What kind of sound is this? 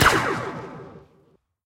enhanced blaster 2
Star wars blaster-type weapon
laser, gun, weapon, blaster, Star-wars